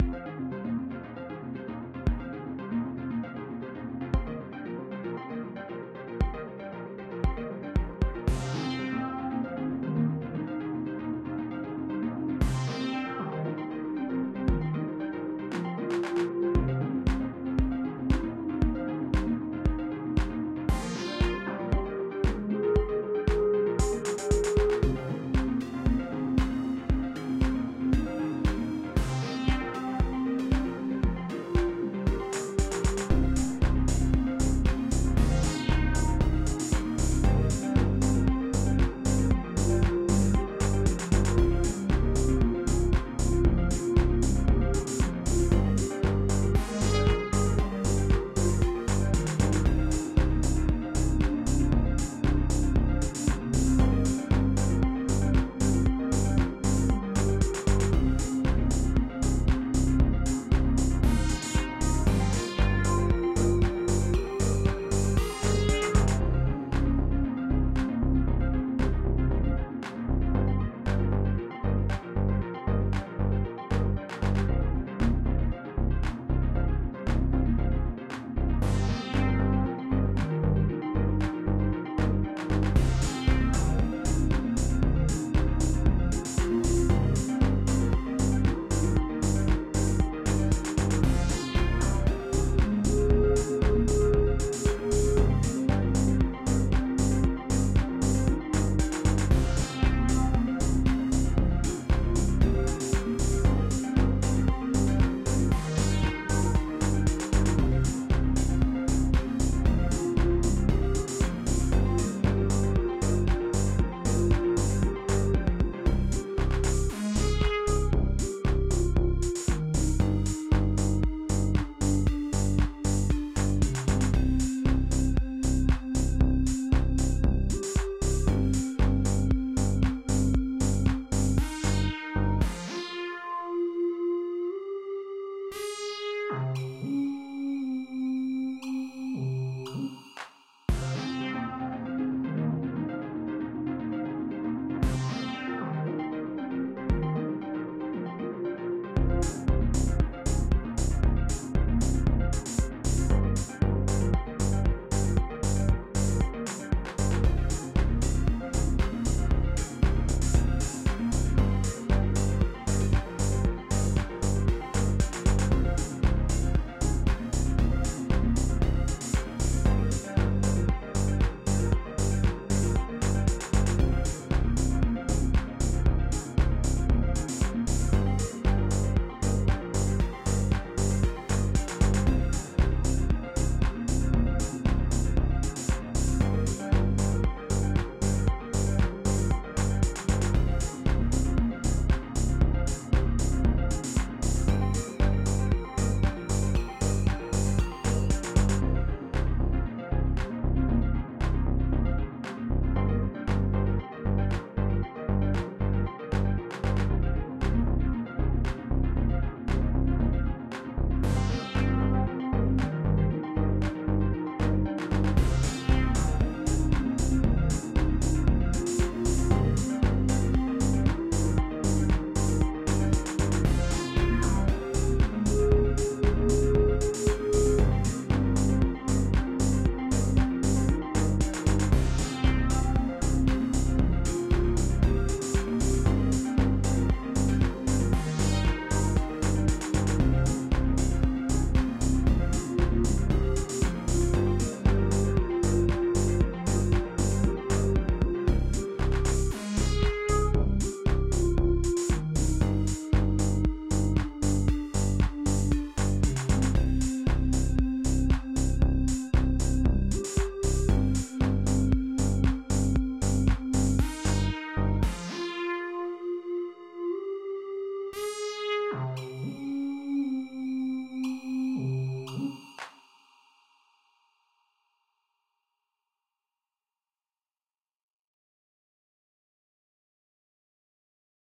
116 bpm oldschool electronica
a C minor small theme i did with 8track
80s, electronica, freemusic